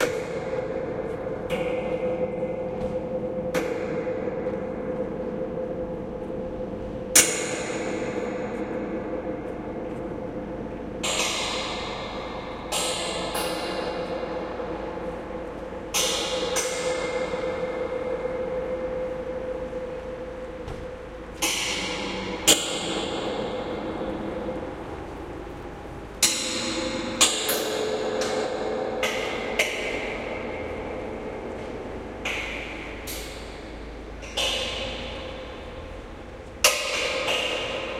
Pushing the microphone against a metal fence and then hitting it. The
resulting sound is mostly the vibrations of the about 30 meter long
fence. Quite spectacular.